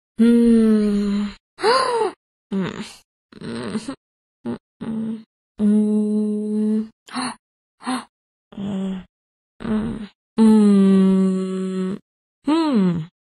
Reacs Girl3
Humm, mmm, Girl, reacs